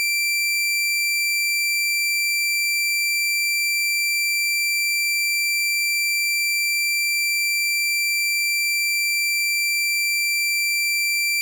Doepfer A-110-1 VCO Saw - D7
Sample of the Doepfer A-110-1 sawtooth output.
Captured using a RME Babyface and Cubase.
Eurorack, negative, modular, oscillator, synthesizer, A-100, slope, multi-sample, analog, sawtooth, raw, VCO, wave, falling-slope, waveform, saw, sample, analogue, electronic